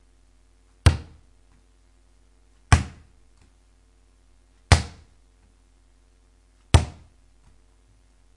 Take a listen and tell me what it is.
ball bouncing on wooden floor
ball, bouncing